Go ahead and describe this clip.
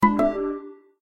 chat-message-2 outgoing message
This is a short notification, originally intended to be for an outgoing chat message. Created in GarageBand and edited in Audacity.
electronic, synthesized, notification, digital